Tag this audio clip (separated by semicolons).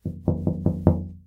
knocking; window